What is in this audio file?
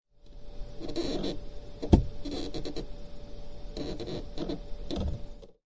SOUND - Computer Desk Keyboard Tray - squeaking
Keyboard tray on large wooden desk being manipulated.
Squeaking of tray rollers on track.
Low squeaking, gurgling
Deep tapping and plastic stressing noises.
Some medium hiss from computer in backround.
click, desk, field-recording, gurgle, pop, ragged, snap, squeak, stressing, thud, wood